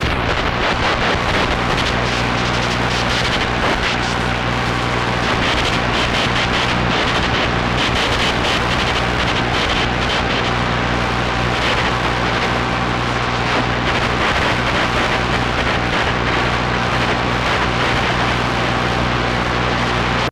Some various interference and things I received with a shortwave radio.